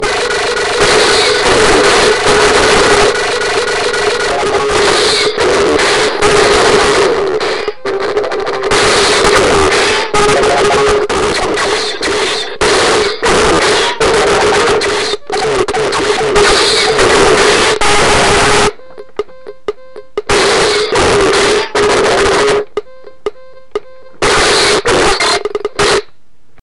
galacticpinball borked
Circuit bent miniature galactic pinball machine. Unfortunately these sounds were about as interesting as it got.